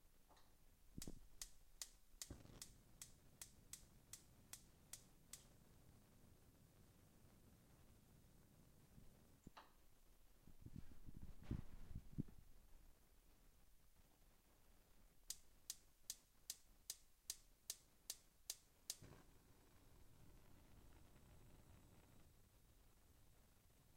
Mono recording of a gas stove igniter
fire, flame, igniter, stove